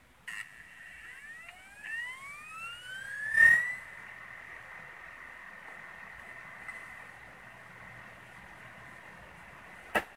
CD SPIN-Start Sonic 1
CD, Click, Epic, Macbook, Machine, Mecahnical, Plastic, Player, RAW, Sonic, Spin